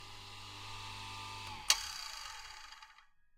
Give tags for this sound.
circular-saw
saw
electric-tool

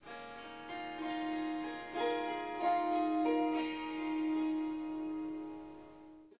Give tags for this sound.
Ethnic
Harp
Indian
Melodic
Melody
Riff
Strings
Surmandal
Swarmandal
Swar-sangam
Swarsangam

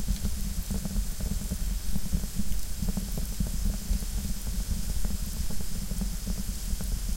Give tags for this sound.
berlin
burn
burning
coal
feuer
fire
flame
flamme
h2
heat
heating
heizung
hitze
ignition
ofen
zoom

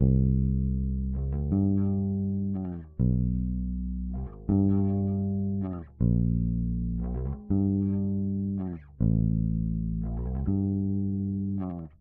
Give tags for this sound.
80
80bpm
bass
bpm
dark
loop
loops
piano

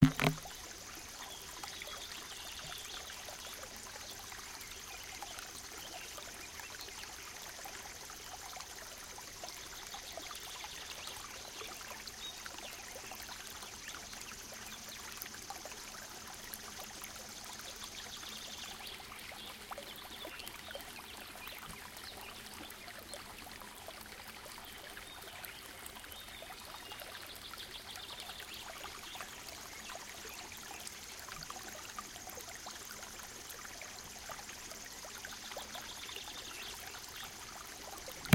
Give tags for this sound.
bird
birds
creek
forest
grasshopper
nature
spring
stream

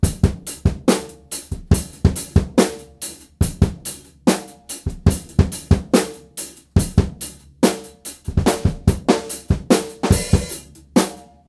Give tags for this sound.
drum
drums
funk
funky
groove
groovy
hip
hip-hop
hiphop
hop
loop
rhythm
rnb
soul

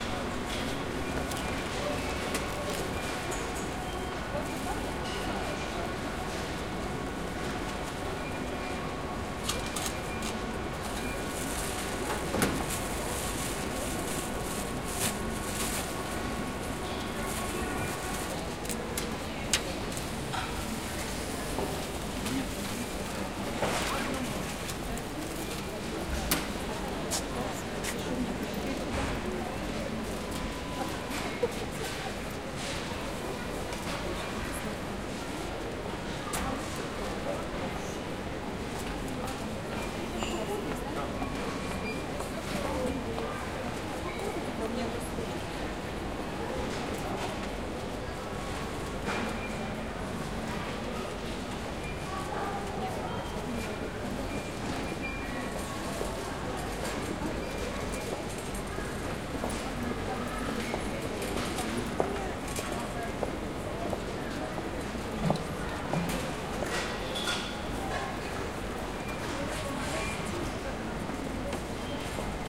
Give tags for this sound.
atmo
buy
buying
cash
ikea
money
omsk
pay
paying
sell
selling
shop
shopping
supermarket

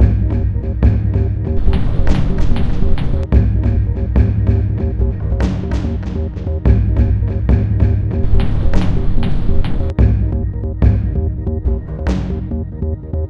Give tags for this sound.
experimental
glitch-hop
monome
undanceable

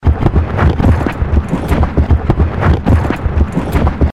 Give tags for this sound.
field-recording
passing
train

